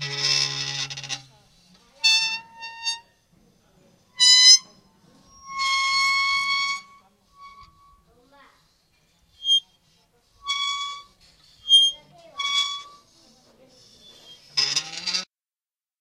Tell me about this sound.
playing with metal fountain
juganndo con una fuente
chirp, chirrup, creak, creaky, door, fountain, metal, scraping, scratch, squeak, squeaking, squeaky